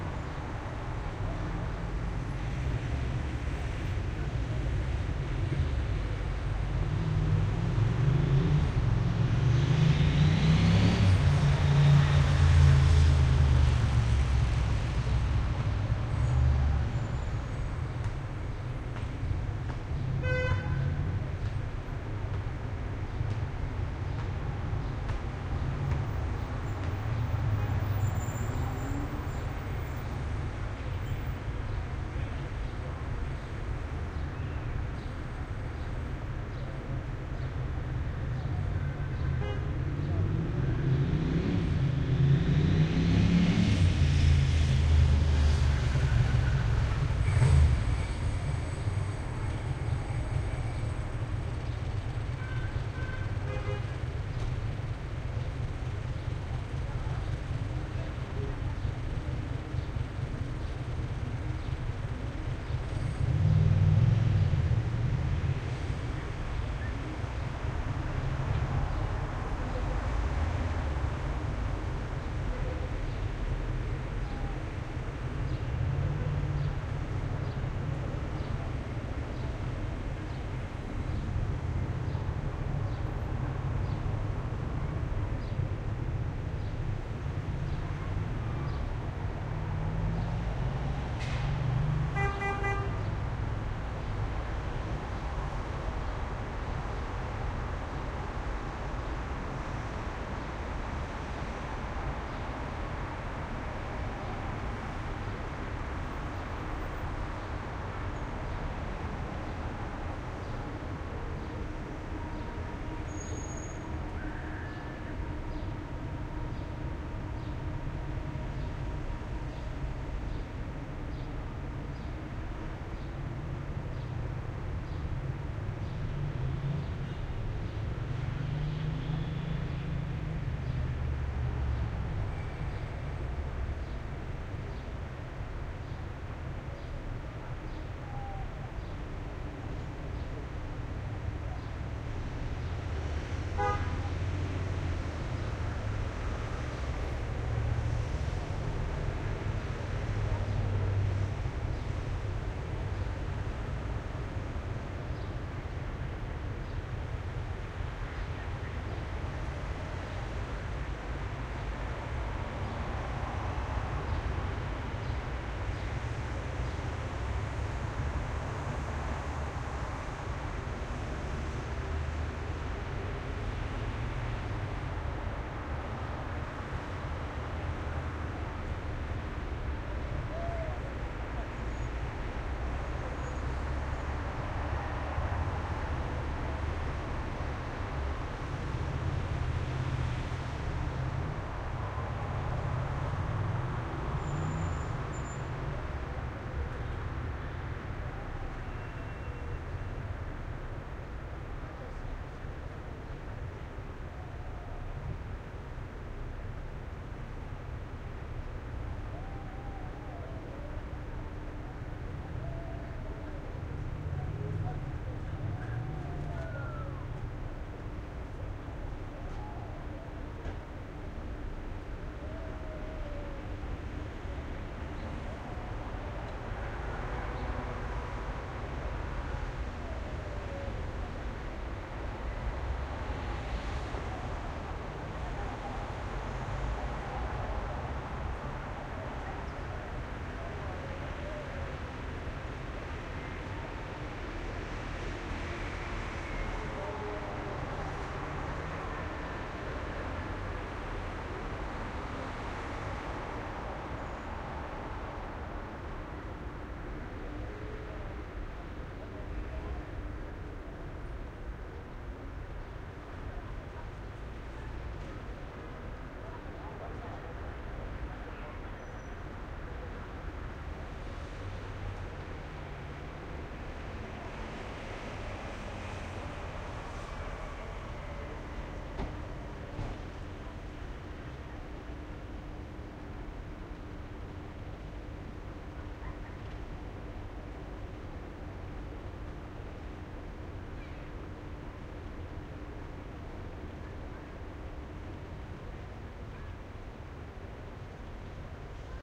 Heavy Traffic From 7th floor 7PM
Ambiance, CITY, OPEN, Paris, TRAFFIC, WINDOW